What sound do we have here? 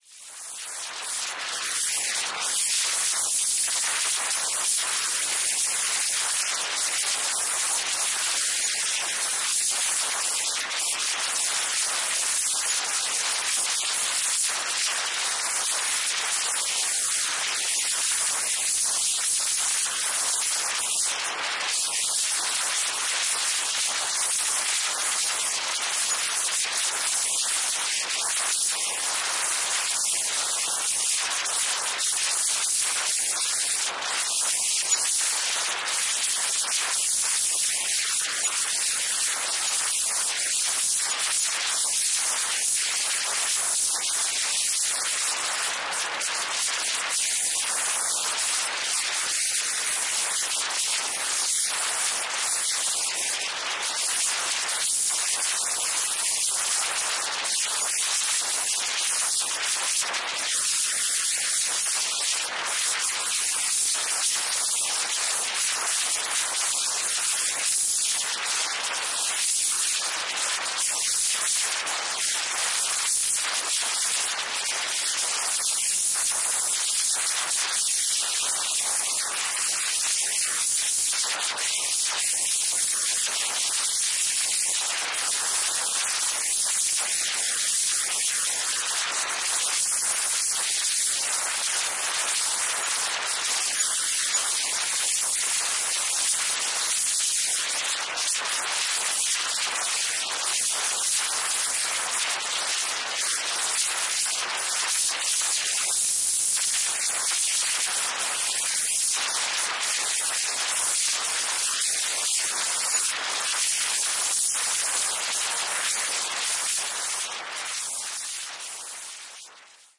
This sample is part of the “Wind” sample pack. Created using Reaktor from Native Instruments. This is a less dense one. No low frequencies.